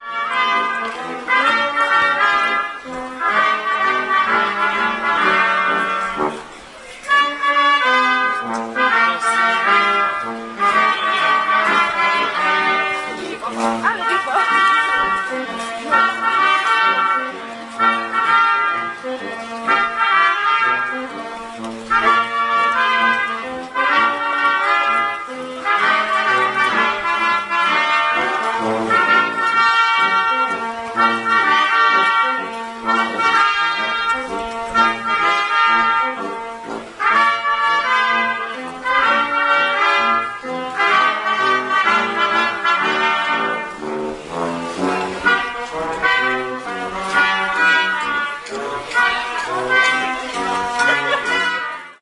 street orchestra 181210
18.12.2010: about 17.00. in front of commercial center Stary Browar on Polwiejska street in Poznan. the trumpet orchestra.
music, orchestra, street